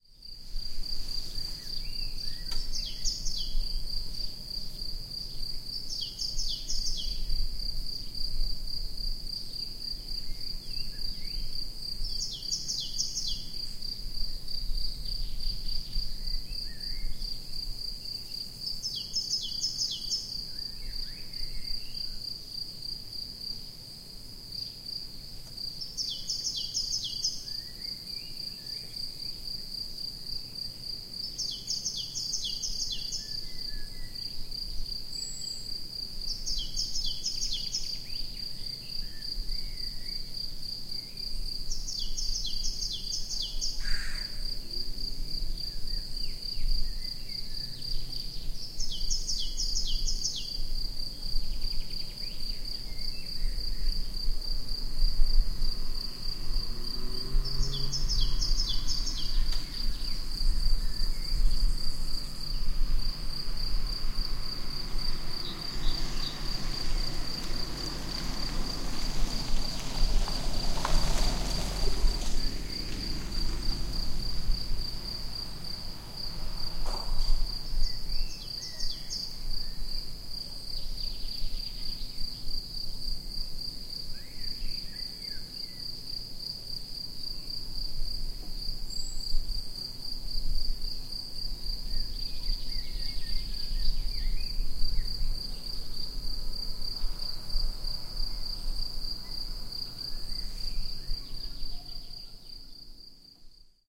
Singing Birds 1 (Kouri Forest - Salonika) 22.05.12 18:10
Afternoon songs from little birds in the forest of Kouri near Asvestochori village Thessaloniki, Greece.
Bad time, the noise of a passing car. I use Adobe Audition CS 5.5 for better results. Recording Device: ZOOM Handy Recorder H2.
Kouri, Rellax, Environment, Forest, Birds, Vehical